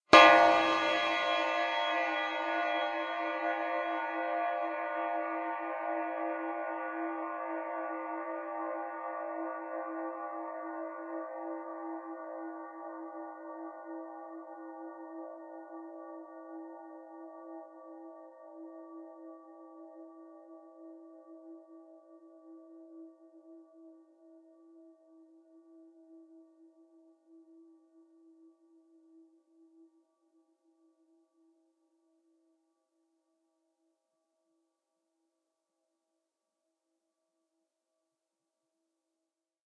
Clang Cinematic
metal, stereo, metallic, xy, steel, cinematic, clang, percussion